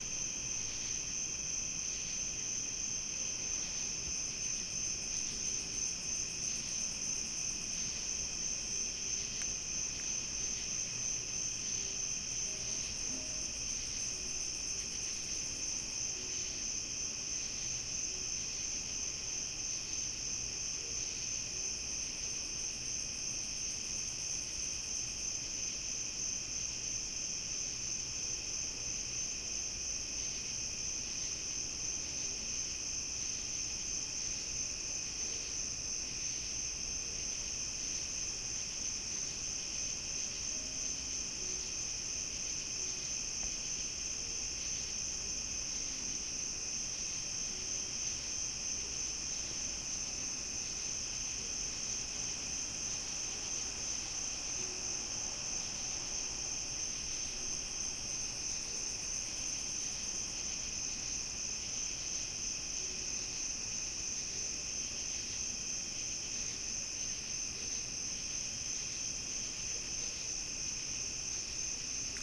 Crickets on Summer Night (binaural)
Outside the house with crickets. If you listen carefully, you can hear my father playing the piano inside.
Recorded with a Zoom H1 with two Sony lavaliere microphones attached, tucked into home-made felt pouches as windscreens, which I suspended from my glasses frames.